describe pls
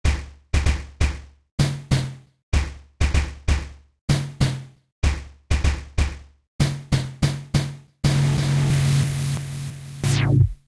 Boat Motor Percussion w FX 90 BPM
Boat Motor Effected with Sugarbytes Looperator and played MPC style
FieldRecording, Mechanical, Motor, Motorway, Transport